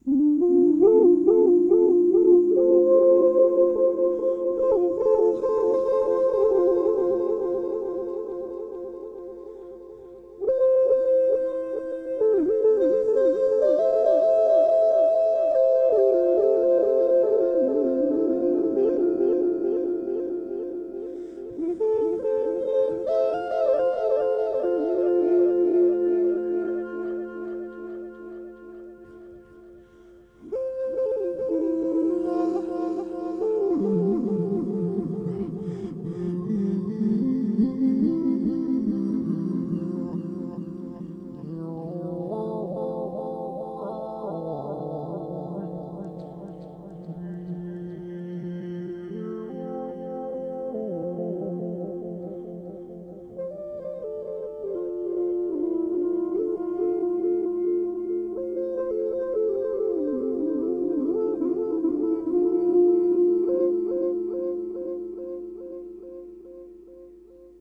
vocal harmony
exploring using my voice as an ambient pad sound.
harmony, vocal, pad, ambient